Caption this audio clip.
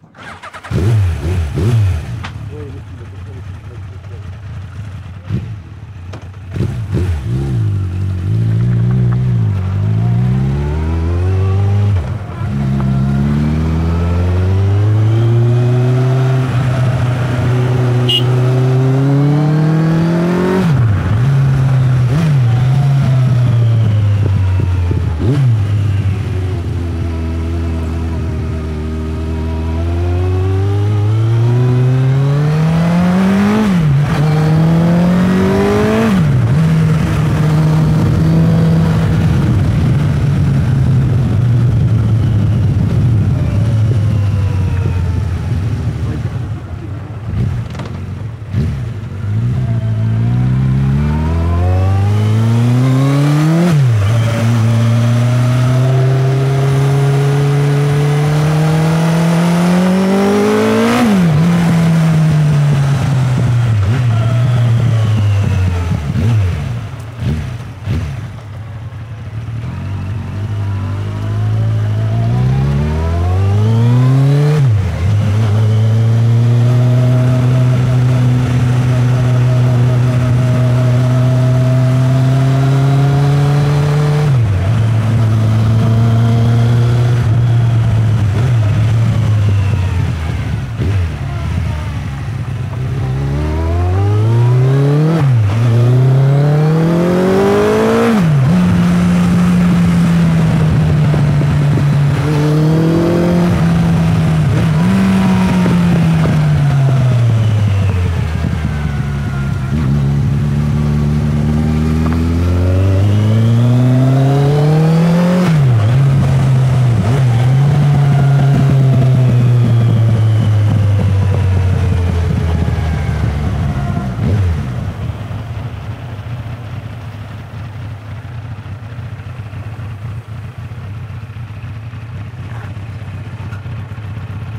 field recording of yamaha motorbike with sanken cs3e mic. Can't remember the model but was very powerful.